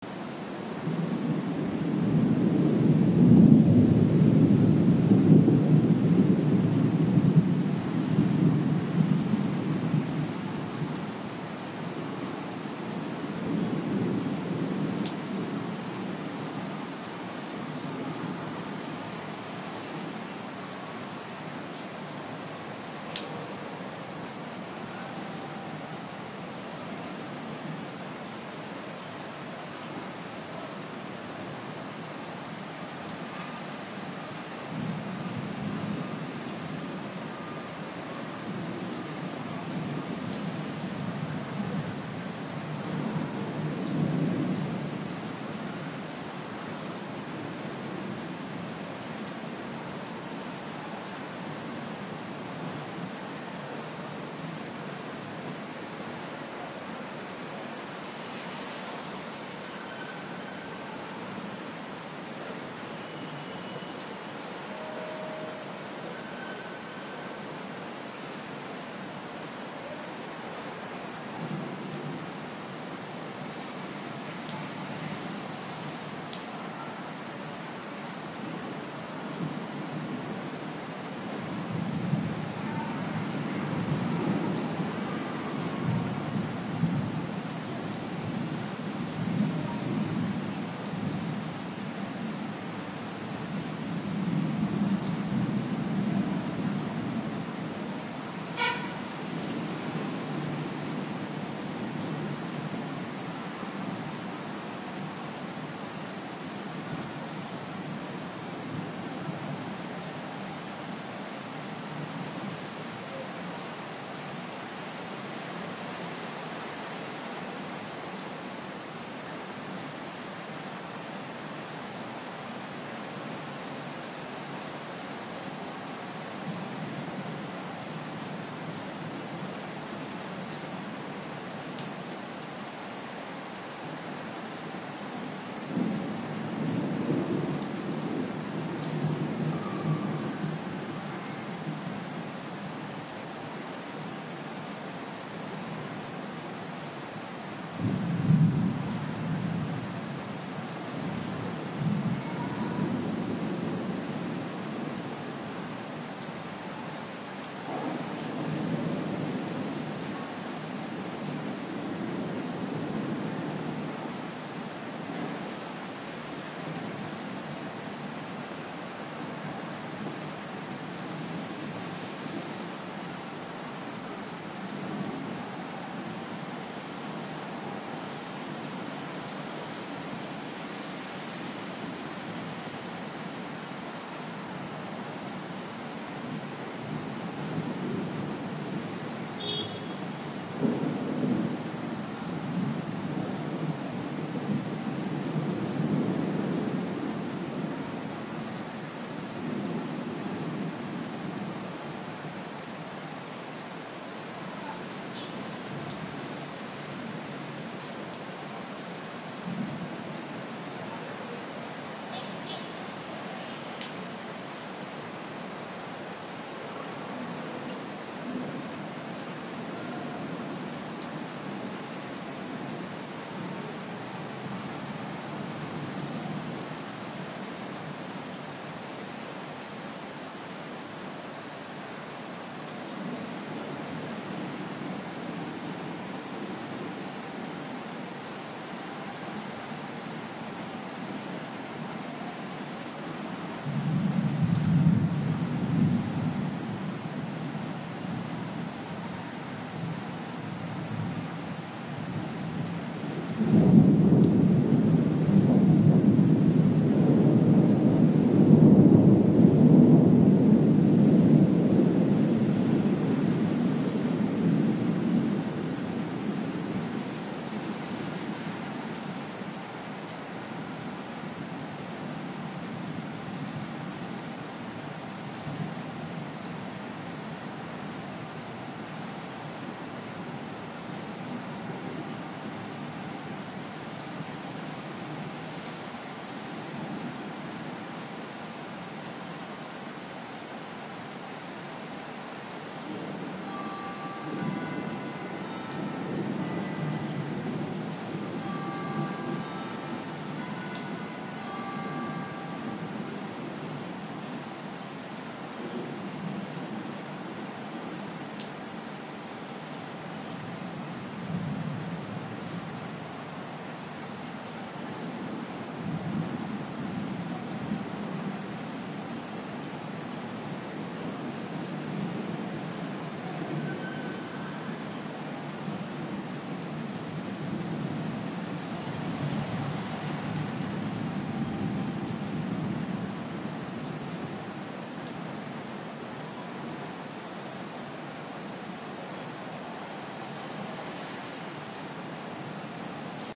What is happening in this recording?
Thunderstorm recorded in Macau, using an iPhone6